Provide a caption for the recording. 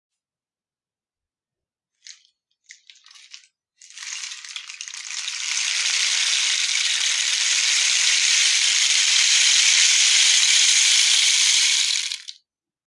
Rolling pebbles enhanced
These sounds are produced by the instrument called rainstick. It has little pebbles inside that produce some interesting slide noises when held upside down or inclined.
I hope they can help you in one of your projects.
handheld, smartphone, Indoor-recording, LG, format, instrument, rainstick, device, recording